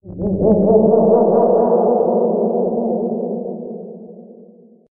Evil laughs deep in his cave
Made by FM synthesizer
frequency-modulation, ghostly, horror, nightmare, reverberated, scary, spooky